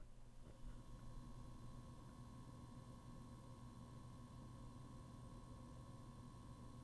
This sound effect was recorded for a college project, where it was used for an ambient sound of an alien spaceship. I decided to upload the sound onto here so that other people can use it. The source of this sound effect is the sound of a PC.